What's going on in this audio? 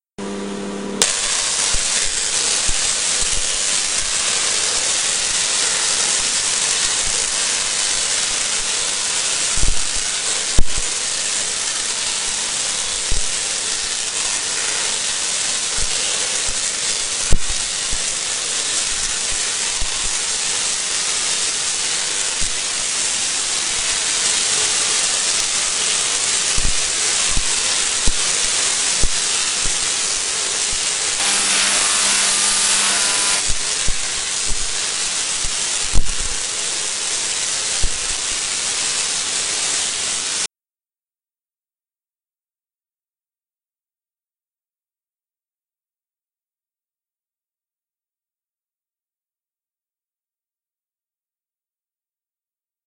high-voltage-discharge-1
This is a tesla coil with a discharge that sounds muck like an old style 'stick' arc welder. For the technical it is a tesla coil that uses a static spark gap, while high-voltage-discharge-2 clip uses a rotary gap giving a different sound altogether. Recorded at a display I did at Kew Museum London in 2012
High-Voltage, high-voltage-spark, tesla-coil